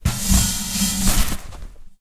Scraping and hitting a metallic hollow object. Sounds a bit like a trash bin. Recorded in stereo with Zoom H4 and Rode NT4.